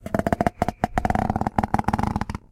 Finger slipping over plastic lid
Rubbing finger across the lid of a plastic container.